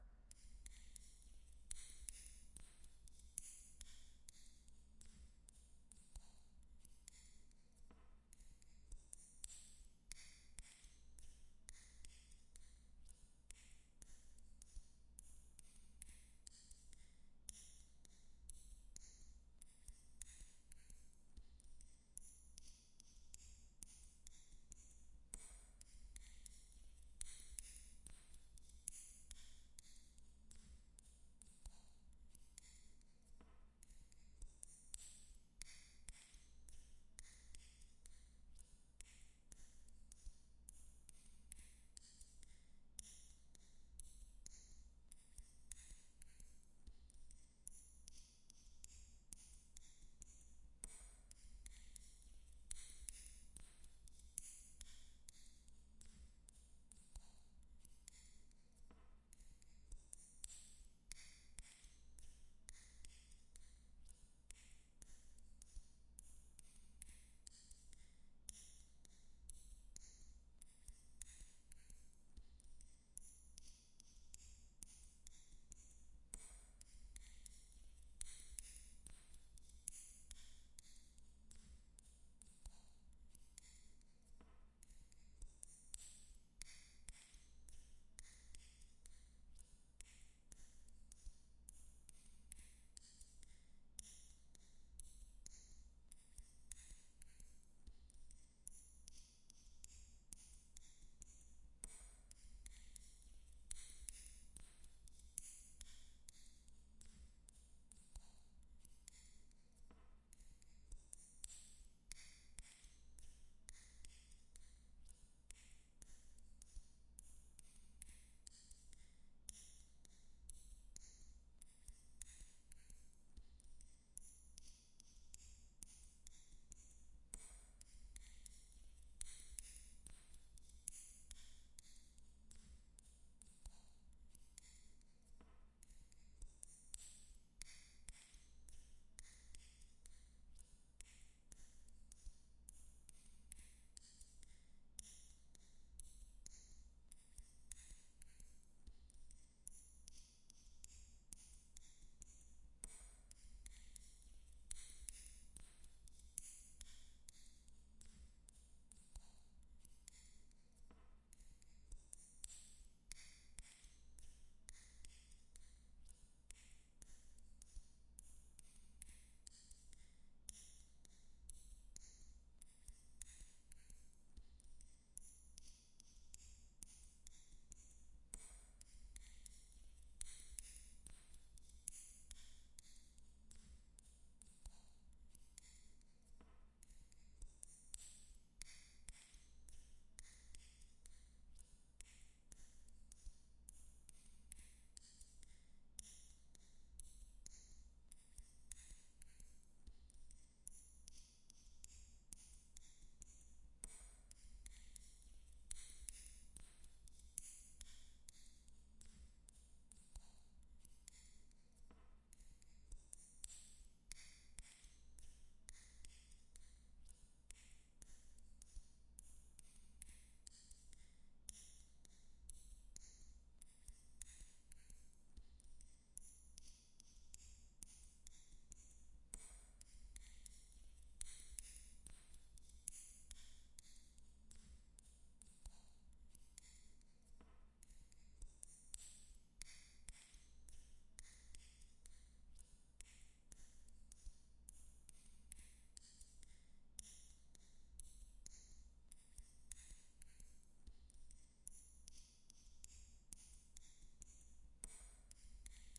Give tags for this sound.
click knitting-needles tick metal

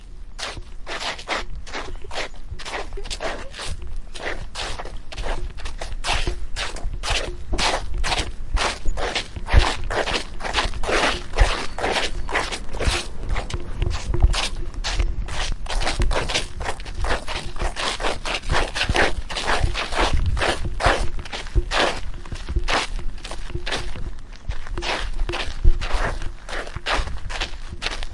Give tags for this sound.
arrossegament ground shoes shuffle

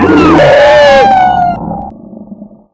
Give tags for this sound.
Creepy Dinosaurs Horror

